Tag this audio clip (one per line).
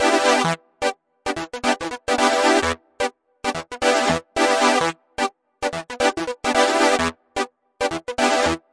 synth
funk